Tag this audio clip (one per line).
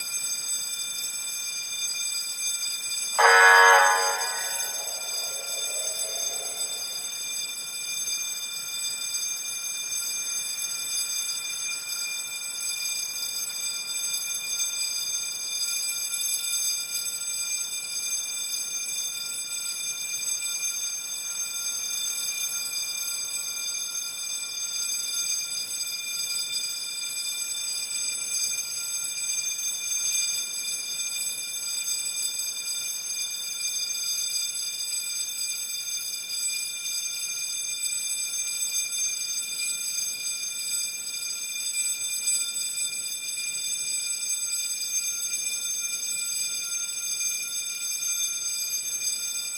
alarm
break-in
burglar-alarm
police-siren
riot
robbery
store-alarm